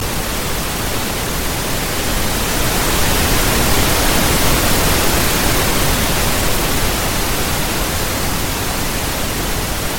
A 10 second pink noise wave generated using Audacity. Brown noise/red noise/random walk noise, is the kind of signal noise produced by Brownian motion, (Discovered by Robert Brown in 1827)